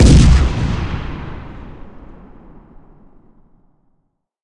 From my "Tanks Can Fly" Video.